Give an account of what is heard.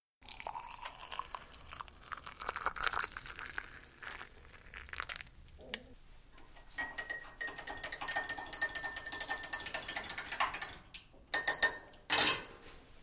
The sound of a hot drink being poured and stirred
coffee, pouring, tea